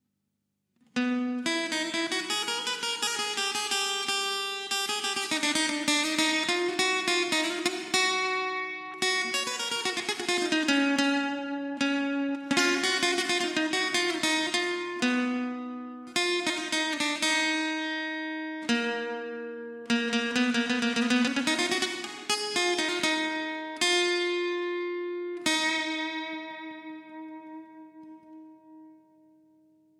acoustic guitar flamenco classical passage
acoustic, guitar, Spain, Spanish, flamenco, folk, rock, short passage, video, game, dramatic
acoustic, spain, rock, spanish, folk, guitar, flamenco